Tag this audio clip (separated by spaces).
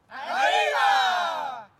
cheering Group